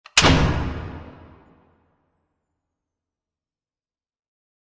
Sound effect of a large circuit breaker. Added large room space.
I ask you, if possible, to help this wonderful site (not me) stay afloat and develop further.